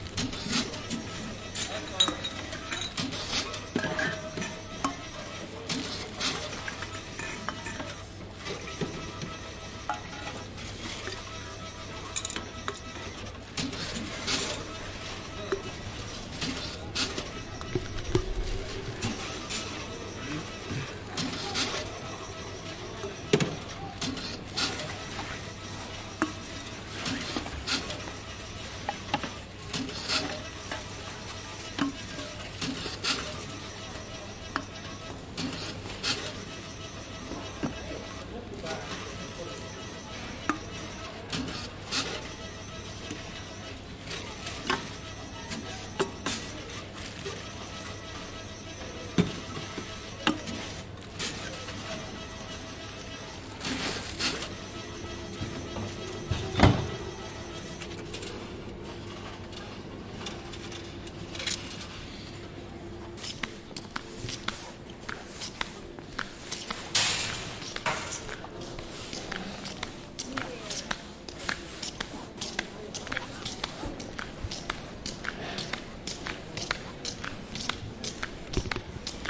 Bottle recycling machine in a shop., Riihimaki citymarket 2013, recorded with zoom h2n and cut with audacity